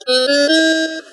toy saxophone (3)
Sound of a toy which i recorded in a toyshop using the mic on my phone. Chopped, cleaned and normalized in Adobe Audition.
lofi, saxophone, toy